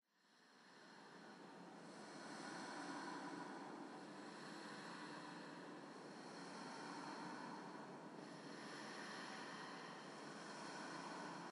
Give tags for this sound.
breath
woman

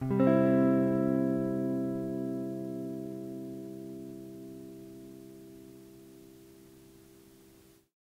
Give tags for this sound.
guitar
lo-fi
mojomills
lofi
el
Jordan-Mills
tape
collab-2
vintage

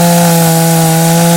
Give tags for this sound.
motor
chainsaw
cutting
gas
cut
saw
chain

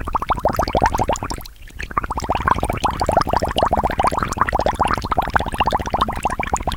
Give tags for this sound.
bubbles
water

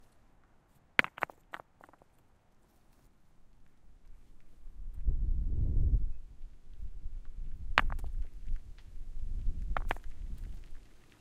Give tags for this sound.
field-recording
nature
rock
stereo

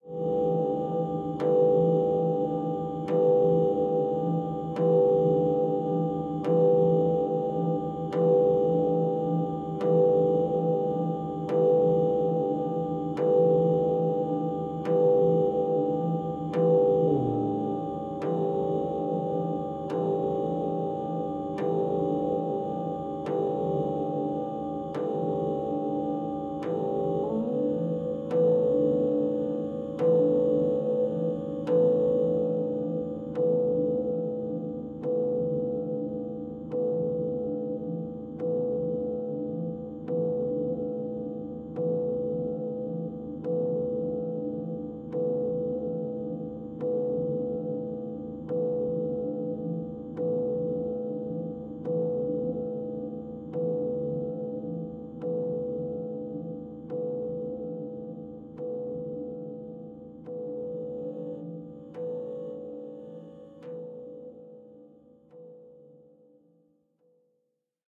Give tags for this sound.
experimental
ambient
artificial
soundscape
drone

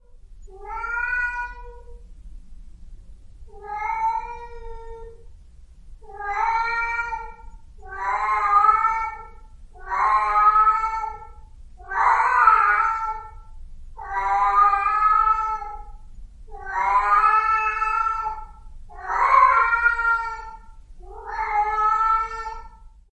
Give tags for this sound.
pet
cat
animal
pets
cats
domestic
meow
animals

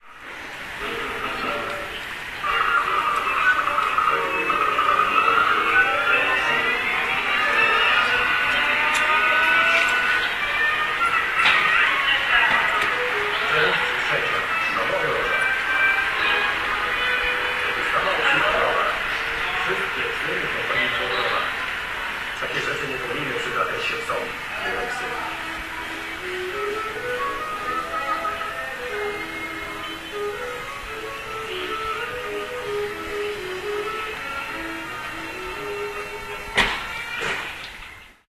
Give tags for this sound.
field-recording
open-window
out-of-a-window
poland
poznan
street
tv
voices
watching

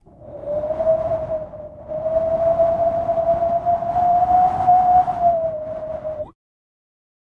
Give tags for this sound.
Breeze
Wind
Windy
Cold
Arctic
Storm

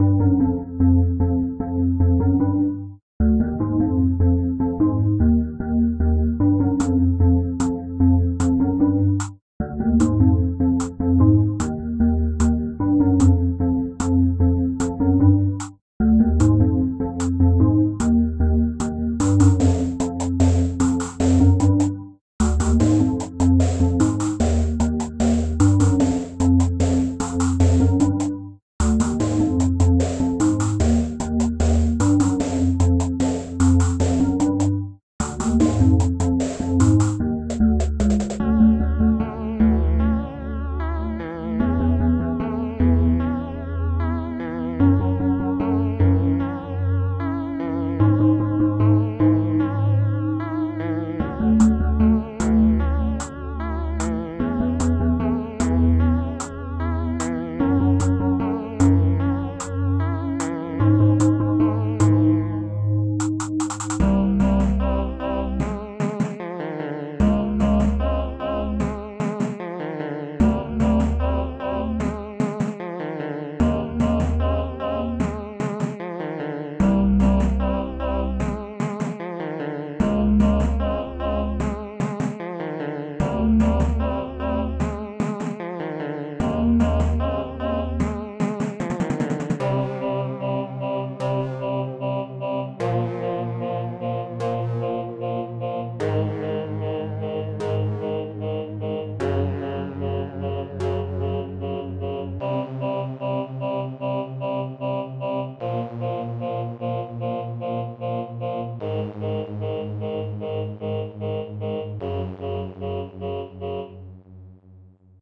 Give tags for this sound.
melody
music
retro
soundtrack

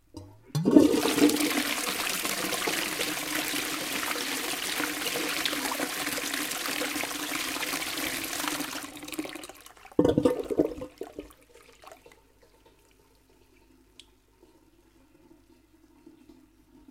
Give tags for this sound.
flushing
toilet

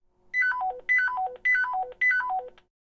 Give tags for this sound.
alert
radio
field-recording
siren

